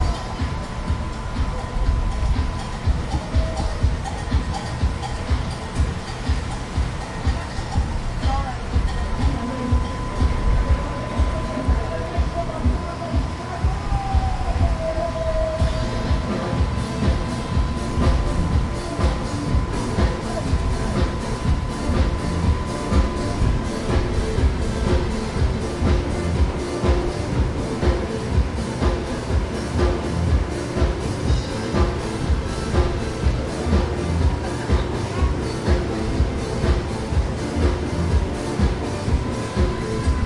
Can't remember exactly what this is, maybe I'm outside a club in Mexico taking a recording.